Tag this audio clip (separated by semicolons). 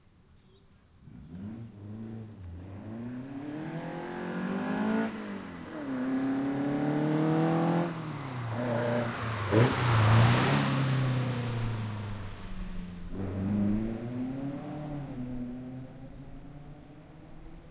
Lotus; Gear-Change; In-Car; Sports-Car